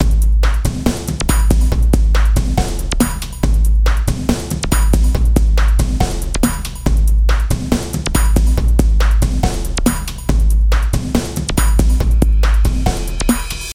Commoditize-140bpm
light industrial beat consisting of deep bass riff, electronic beats and processed acoustic drums
140bpm, bass, loop, breakbeat, break, electronica, dance